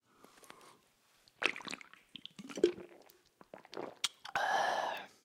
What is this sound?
Drinking a beer recorded on DAT (Tascam DAP-1) with a Sennheiser ME66 by G de Courtivron.